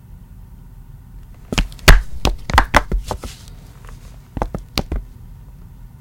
walking footsteps flat shoes tile floor 1
A woman walking on tile floor in flat shoes (flats). Made with my hands inside shoes in my basement.